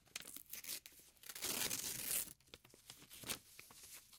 Ripping a page of paper